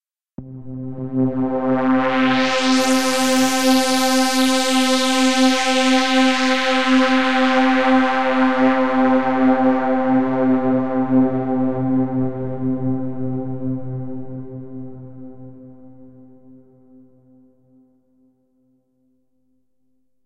cine rotor5
made with vst instruments
background, film, drone, scary, movie, sci-fi, sfx, trailer, pad, hollywood, soundscape, drama, thiller, suspense, deep, spooky, dark, ambient, cinematic, space, ambience, soundeffect, dramatic, horror, thrill, background-sound, mood, atmosphere, music